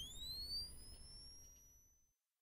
The high pitched sound of a bomb arming itself and charging.
arming, bomb, charge, detonate